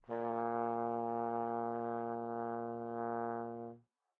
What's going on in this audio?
One-shot from Versilian Studios Chamber Orchestra 2: Community Edition sampling project.
Instrument family: Brass
Instrument: OldTrombone
Articulation: vibrato
Note: A#1
Midi note: 35
Room type: Band Rehearsal Space
Microphone: 2x SM-57 spaced pair